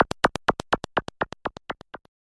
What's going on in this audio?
small footsteps
bugs, video, film, fairy, animation, game, movie, cartoon